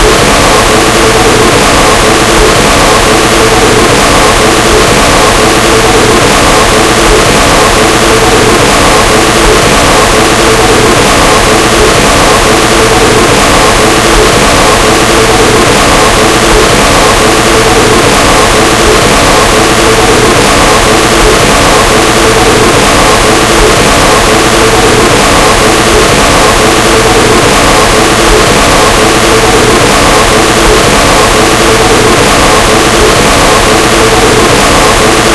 you can use this sound anywhere or for jumpscares, made in Audacity